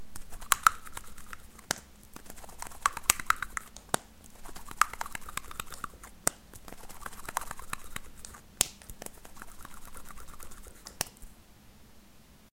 Me opening and closing and twisting an kinderegg
rythm, toys